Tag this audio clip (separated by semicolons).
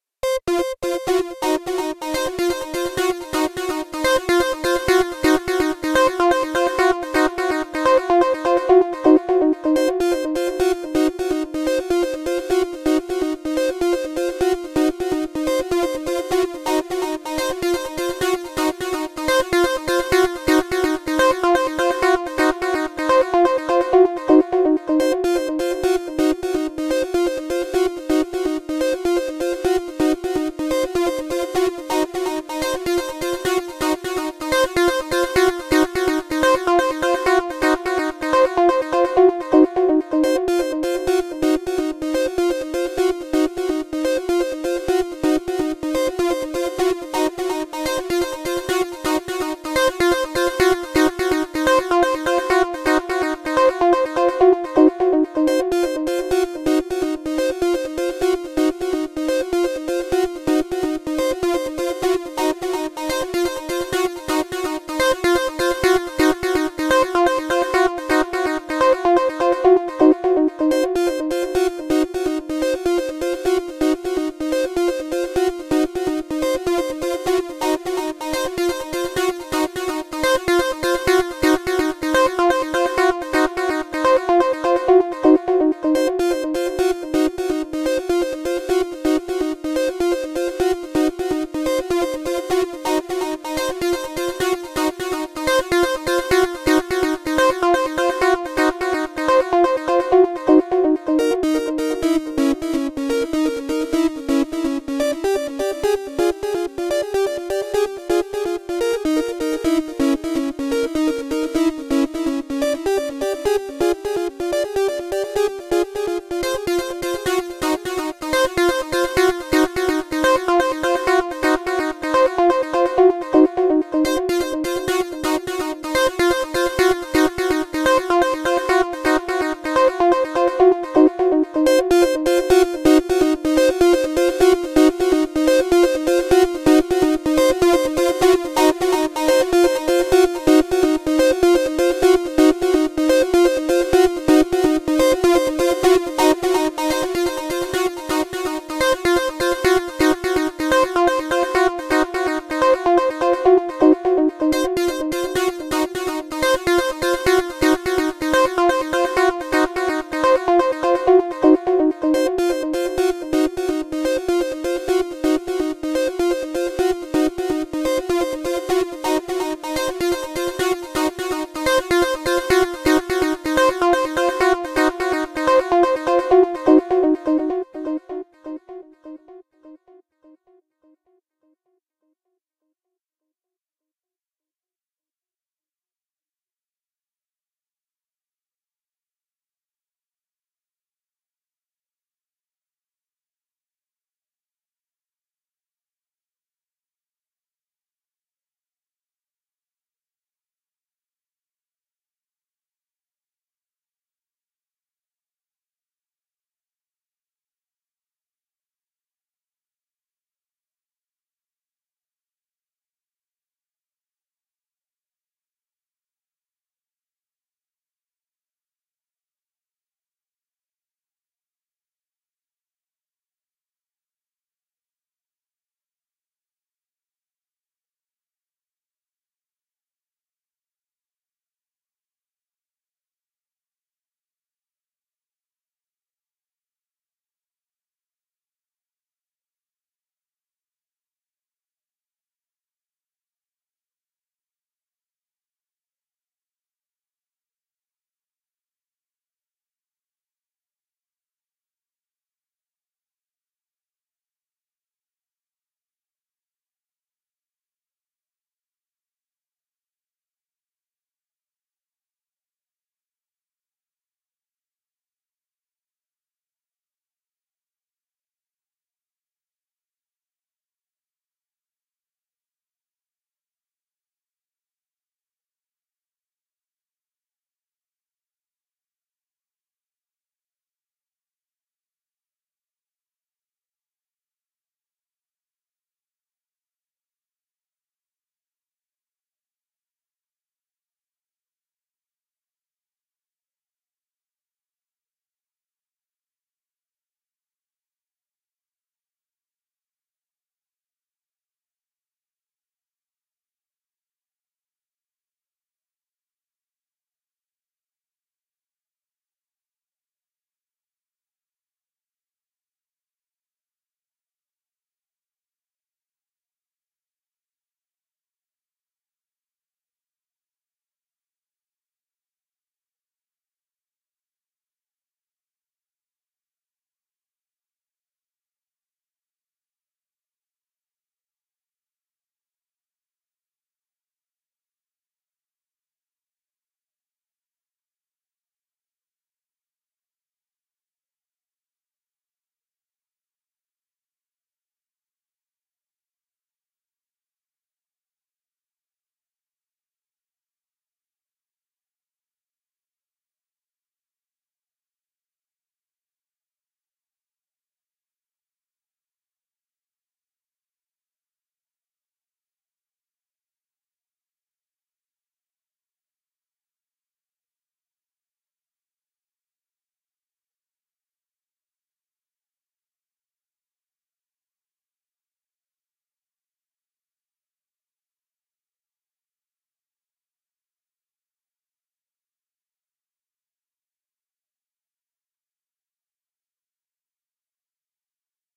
synth; acid